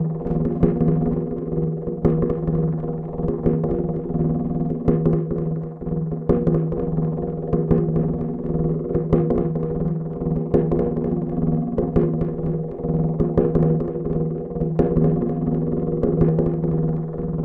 weird synthetic rolled drum